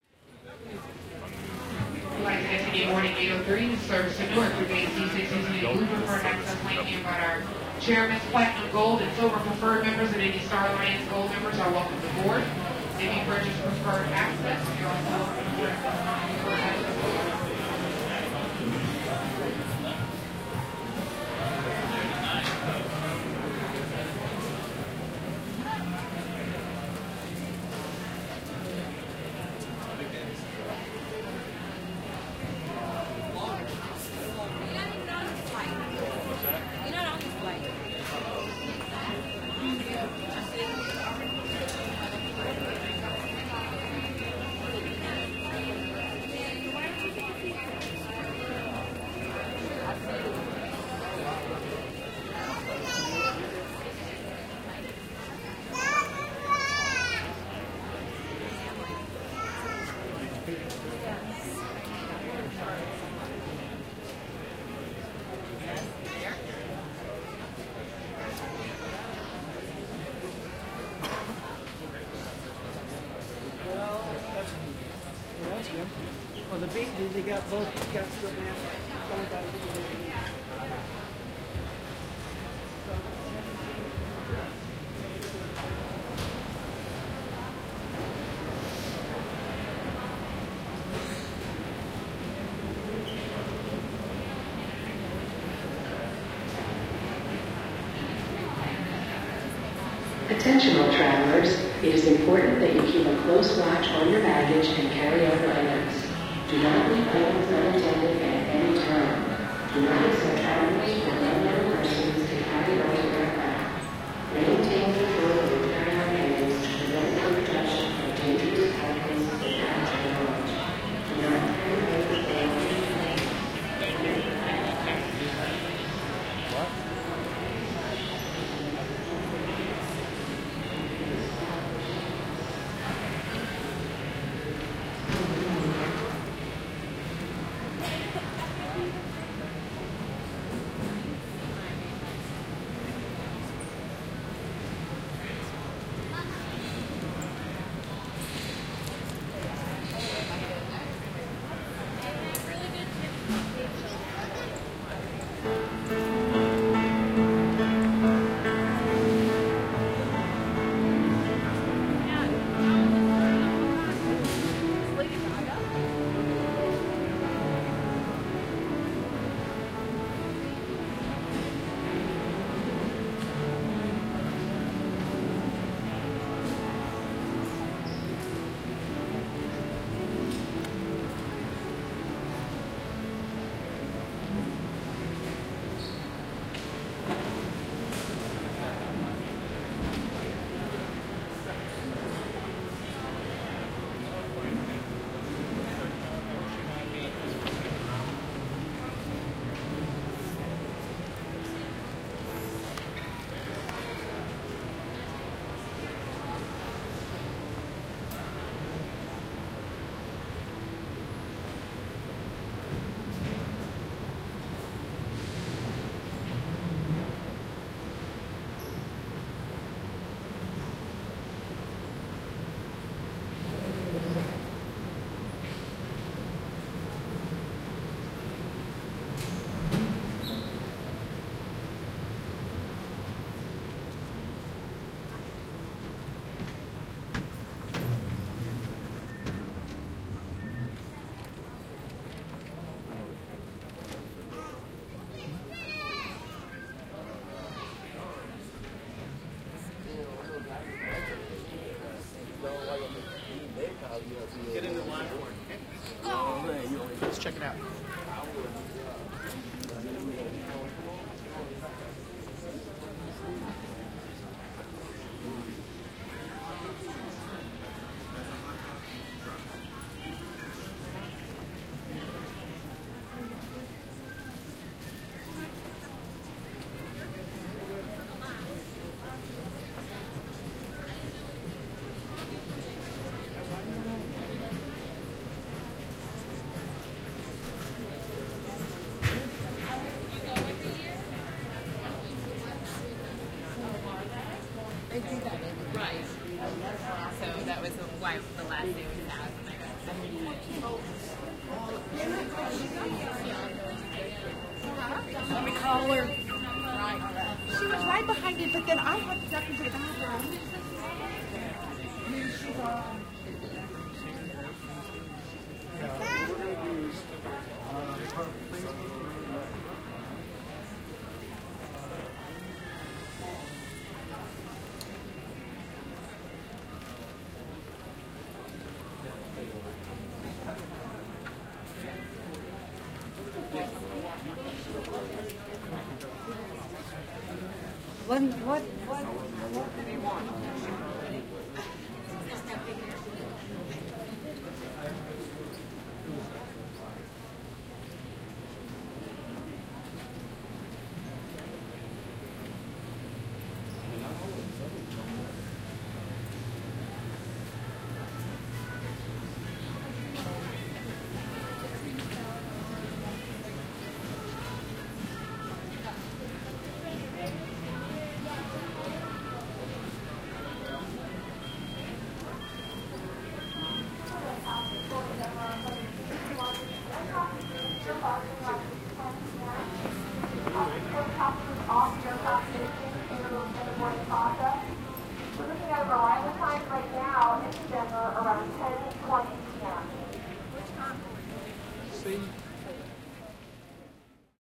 walking charlotte airport c concourse
Walking through the C concourse of Charlotte, NC airport. There are lots of people around, some announcements, even a piano. Recorded with binaural mics (MS-TFB-2 into a modified Marantz PMD661) so best if you listen on headphones probably.
airplane, airport, alert, announcement, binaural, CLT, field-recording, geotagged, inside, north-carolina, people, phonography, soundscape, travel, voices